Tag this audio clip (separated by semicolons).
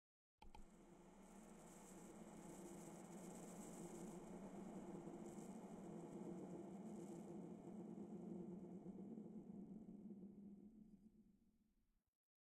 FIDGETSPINNER; TABLE